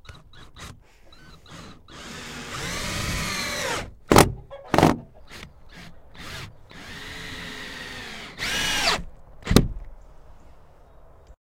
05 screwdriver; near; screwing wood
Close; screwdriver screwing wood metalic smack